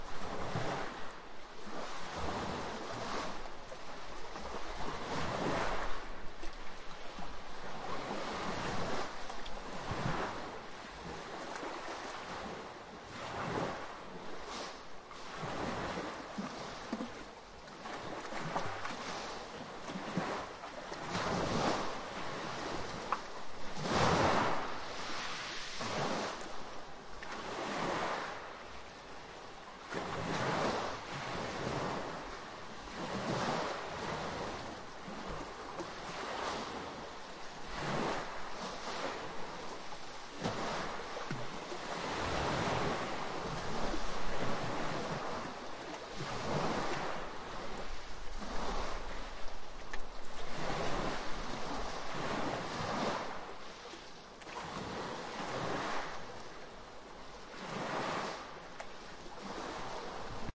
Seawaves inside a seacave
Recorded August 2021 at Naxos Greece with a Tascam DR-100 MK3